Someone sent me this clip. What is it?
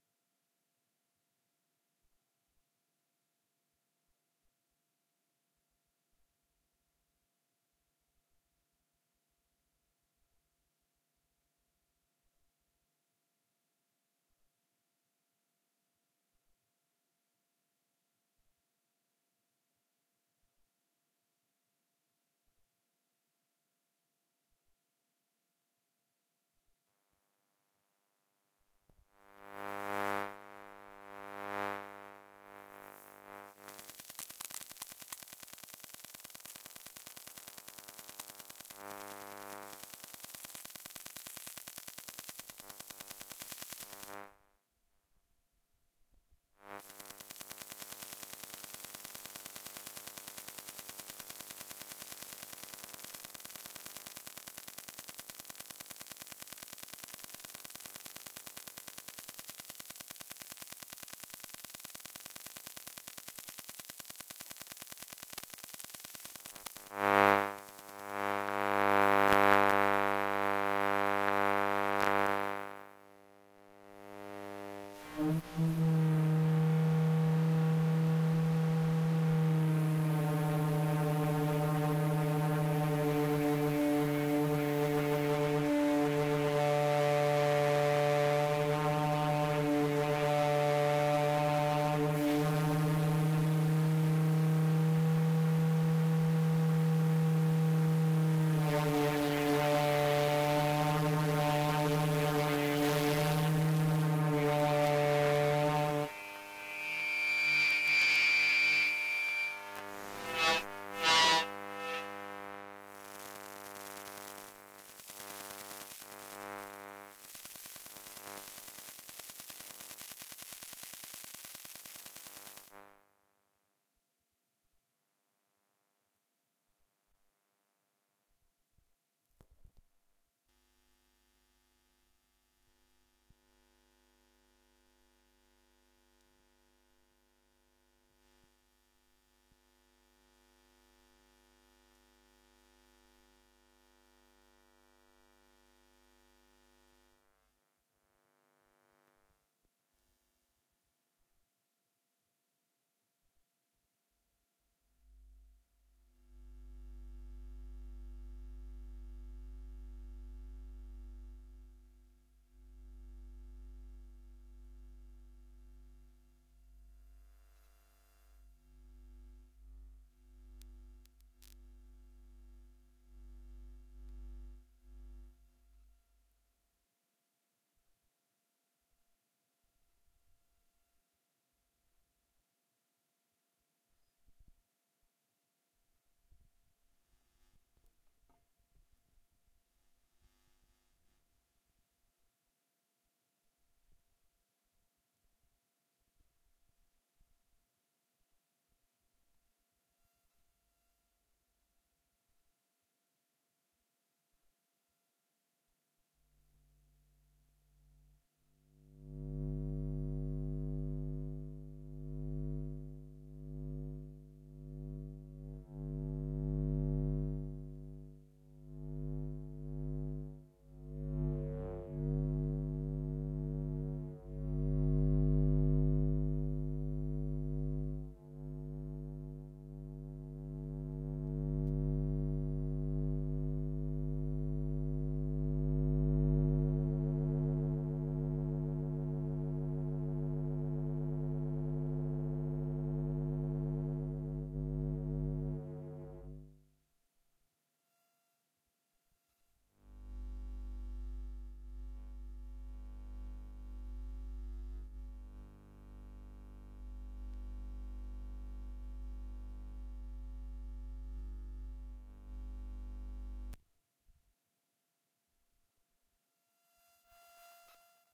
Electrical Noise Recorded With Telephone Pick-up
recording of general electrical noise from appliance using telephone pick-up.
Recorded with Zoom H4n un-processed no low or high cut.
16Bit.
44,100 Stereo.
beeps, Bright, design, Droid, effect, electric, electrical, frequency, fx, glitchy, noise, Oscillation, pattern, pick-up, processing, Pulsating, Random, recording, Repeating, ringing, sample, sound, sound-effect, Techy